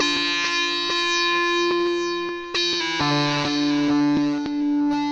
QUILTY - Bonechillin' Pads 003
I forgot about these samples, and they were just sitting in the FTP until one day I found them. I erased the hard copies long ago, so I can't describe them... I suppose, as their titles say, they are pads.
new-age, similar-but-different-in-a-way, interlude, ambient, light, instrumental, pad